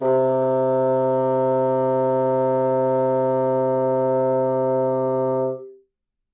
One-shot from Versilian Studios Chamber Orchestra 2: Community Edition sampling project.
Instrument family: Woodwinds
Instrument: Bassoon
Articulation: sustain
Note: C3
Midi note: 48
Midi velocity (center): 95
Microphone: 2x Rode NT1-A
Performer: P. Sauter
bassoon, c3, midi-note-48, midi-velocity-95, multisample, single-note, sustain, vsco-2, woodwinds